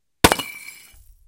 Me dropping a piece of concrete off my deck onto a concrete patio below.